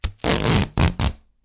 duct tape unroll 6
Quick duct tape unrolling or peeling.
field-recording, duct, fx, rip, tape, tearing, ripping, tear, duct-tape